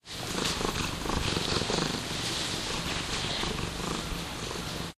Big Mocha purring recorded with DS-40 and edited in Wavosaur.
cat, obese, purr